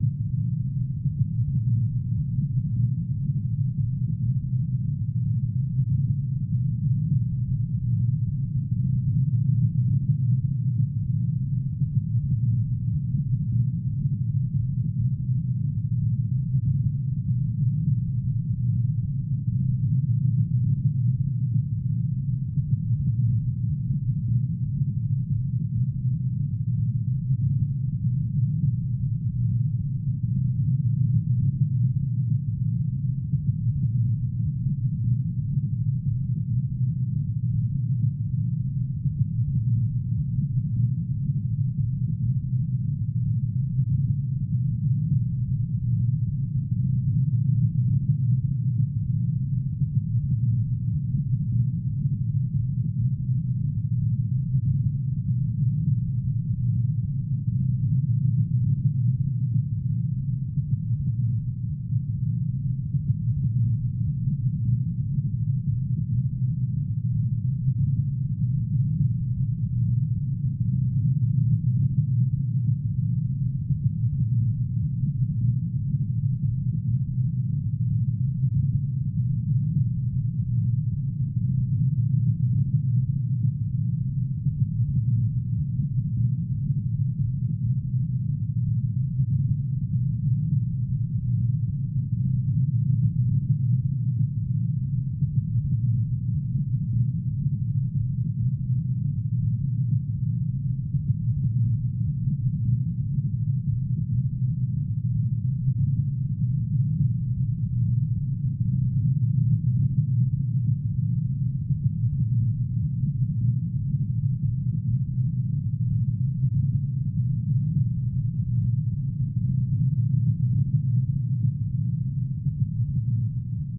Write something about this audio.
active drone (bass)
"active" drone with lots of low-end movement.
active, bass, drone, low-end, movement